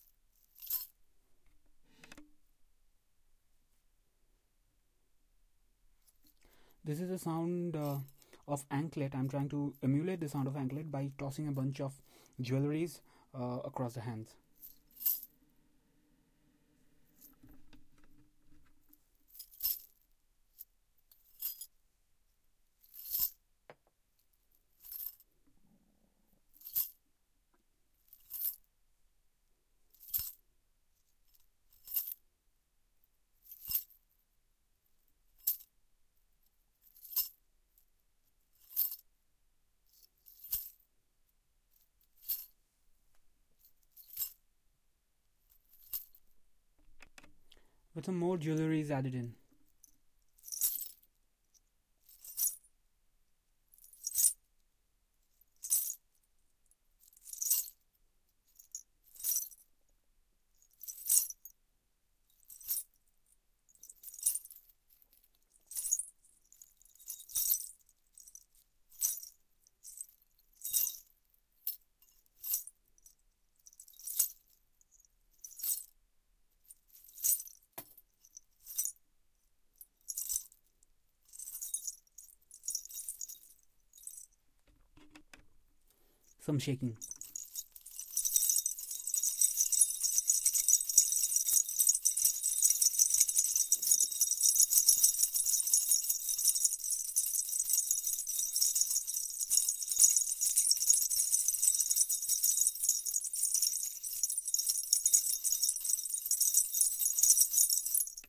Anklet Jewelleries Payal
Sound of some thin anklets tossed from hand to hand